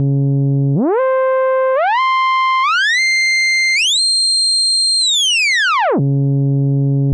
Content warning
The anti-aliasing tests for Sirene (my emulation program of Thermenvox). Note C of different octaves.
Sirene, Thermenvox, Theremin